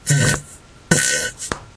fart poot gas flatulence
car, computer, explosion, fart, flatulation, flatulence, frog, gas, laser, nascar, noise, poot, race, ship, snore, weird